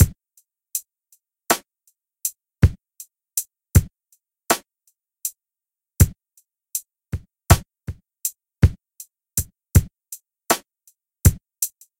SMG Loop Drum Kit 1 Mixed 80 BPM 0021
80-BPM, kick-hat-snare